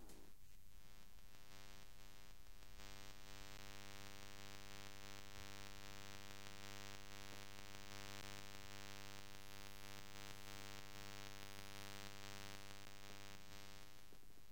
I recorded this sound while sampling my living room :) . It comes out of our Gigaset telephone and my Tascam DR-07 mkII can her and record it.